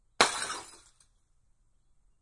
1 medium pitch, loud bottle smash, hammer, liquid